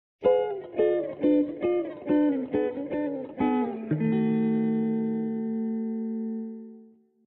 short guitar transitions descending
Recorded with Epiphone sheraton II pro into a Mixpre 6 via DI box, cleaned up and effects added.
descending, electric, guitar, riff